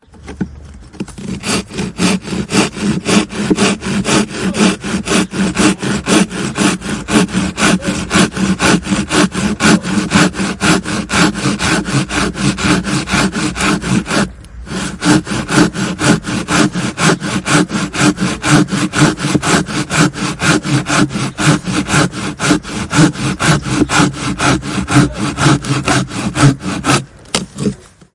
Pruning saw on poplar log. M172 Matched Stereo Pair (Clippy XLR, by FEL Communications Ltd) into Sound Devices Mixpre-3, auto-limiters off.